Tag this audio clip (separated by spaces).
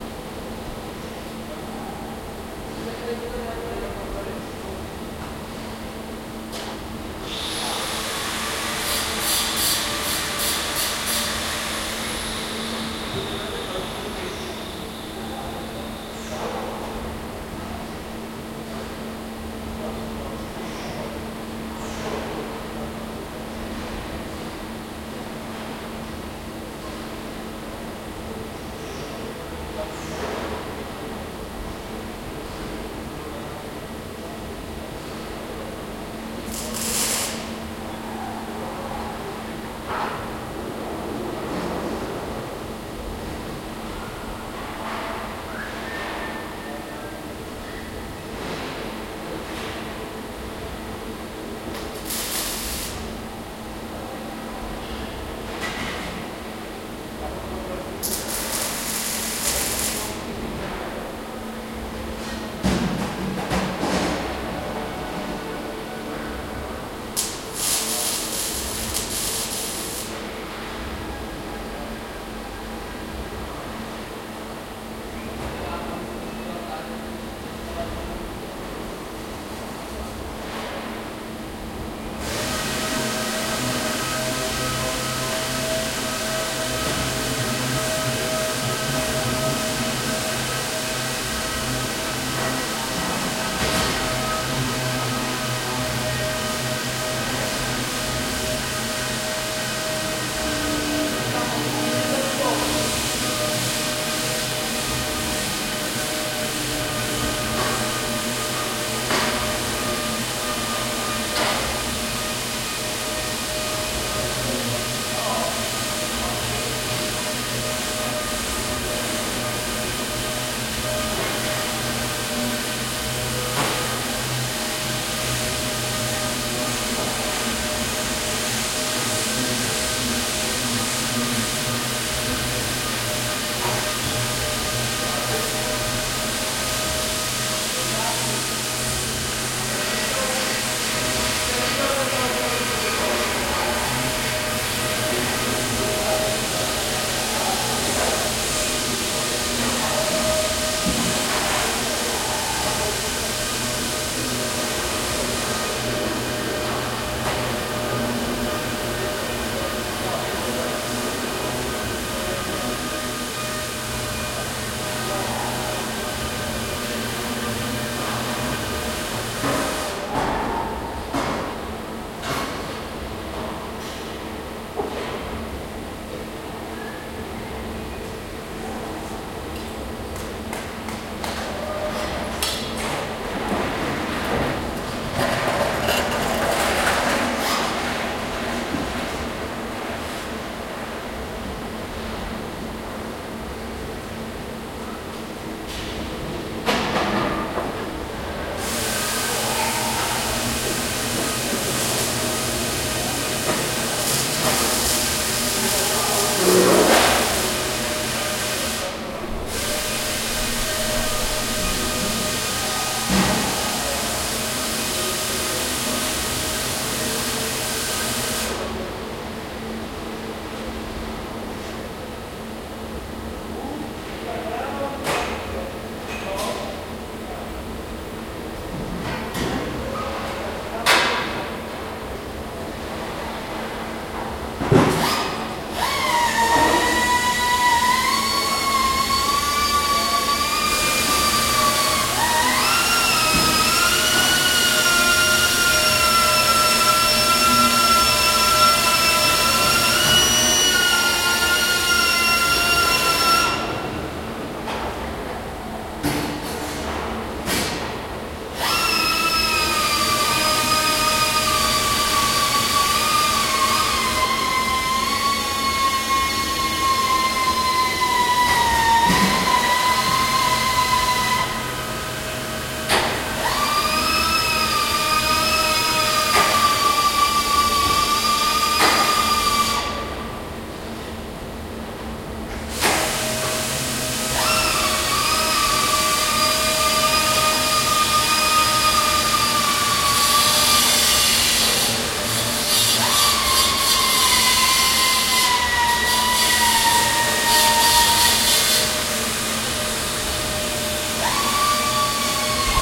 factory city background ambiance ambient ambience atmosphere soundscape field-recording interior metal